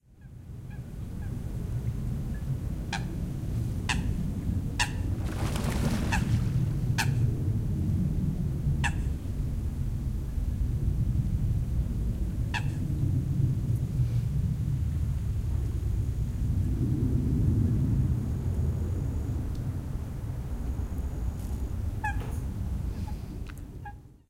Sound recorded in the framework of the workshops "El Delta del Llobregat sona" Phonos - Ajuntament del Prat - Espais Naturals Delta.
Cal Tet lagoon in the Delta del Llobregat, Barcelona, Spain. Coots and planes landing at the nearby airport. Recorded from a hide.
Estany de Cal Tet al Delta del Llobregat. S'escolten fotges (Fulica atra) i de fons avions aterrant a l'aeroport proper. Gravat des de l'aguait.
Coot, El-Prat, El-delta-del-Llobregat, Deltasona, waterfowl